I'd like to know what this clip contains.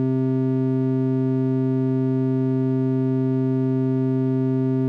A raw single oscillator tone from a Yamaha TX81z. Sort of a half cycle sine wave.